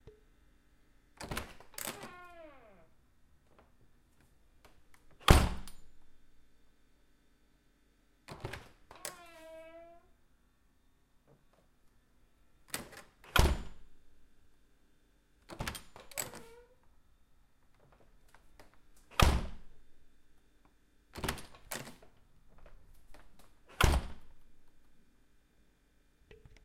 Door opening/closing
Sound of the back door of my house. Check the link below to see the film I used it in.
Close, slam, Open, Squeak, Closing, Squeaking, Door, Creak